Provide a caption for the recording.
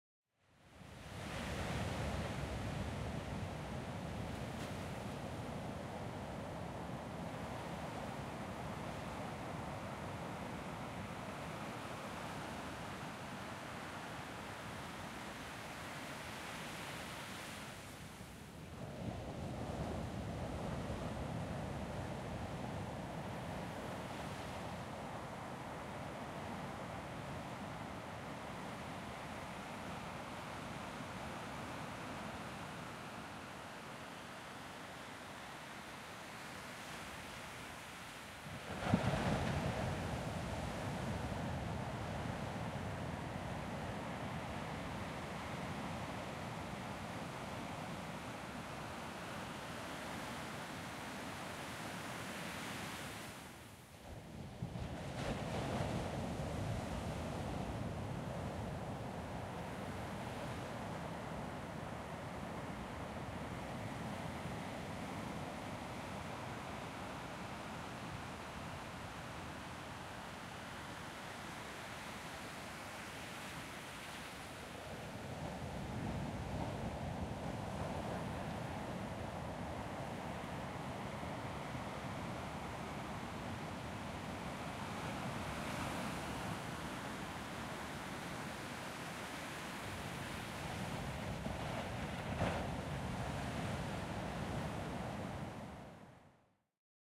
Ocean Waves Reunion Island
A little field recording of the ocean in reunion island.
ambiance, beach, field, ocean, recording, sea, water, waves